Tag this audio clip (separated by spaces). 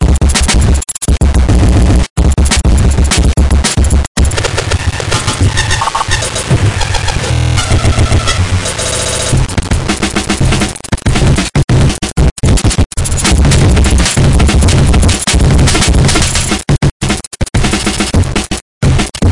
deathcore e fuzzy glitchbreak h k l love o pink processed t y